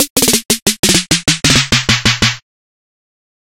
This is a snare loop to use before the drop starts.